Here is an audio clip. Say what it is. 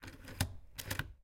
Sound of pressing and relasing self-inking stamp recorded using stereo mid-side technique on Zoom H4n and external DPA 4006 microphone
cancelled, post, paper, self-inking, office, down, stationary, completed, letter, relase, press, aproved, click, stamping, top-secret, bank, certified, stamp